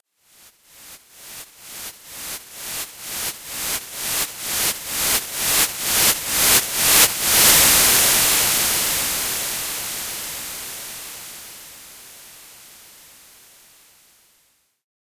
Noise Riser
effect, fx, riser, rising, sound-effect, sweep, sweeper, sweeping